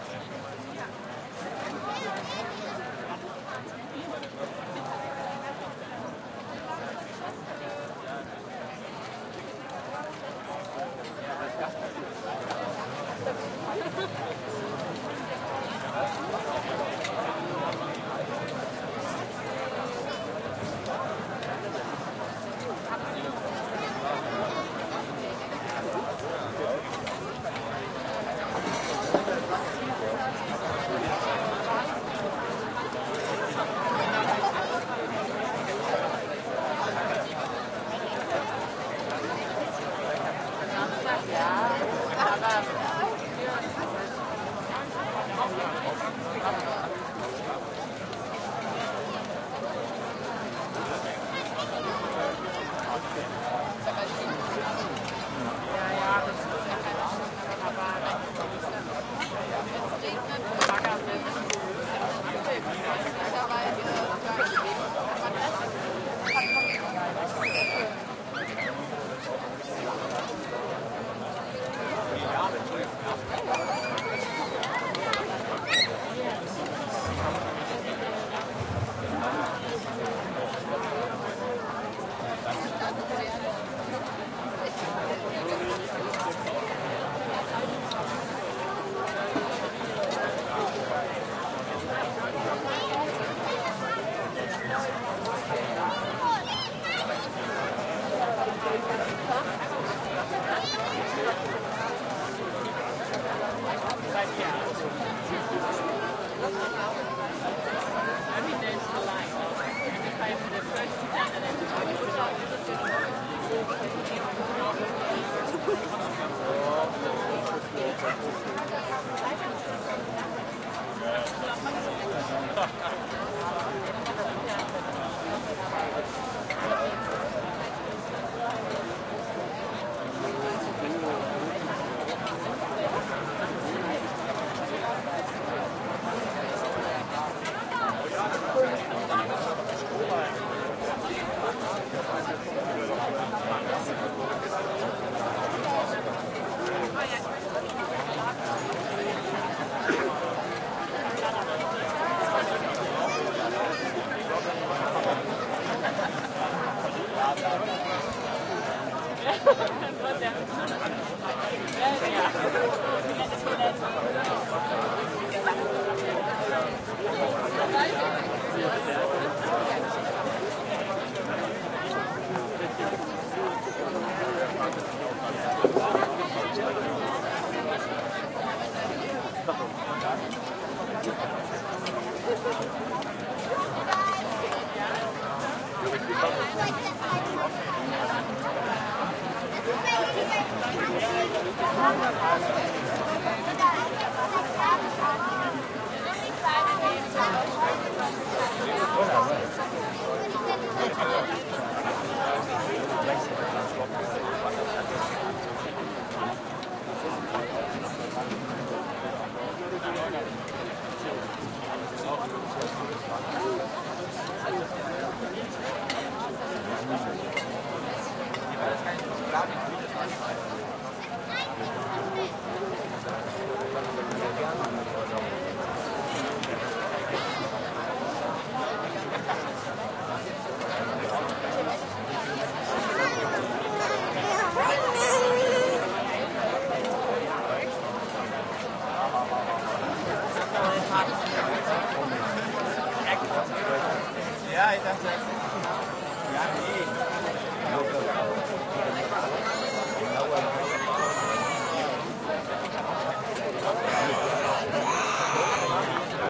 outdoor community festival crowd ext medium meal time active walla german and english voices eating spoons hit metal bowls2 kids playing shouting right